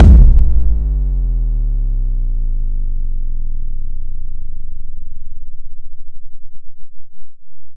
kick drum created by layering kicks and bass sounds, using fruity loops. some filtering and EQ- hard limiting and noise reduction.
drums, bass, drum, kick, layer, layered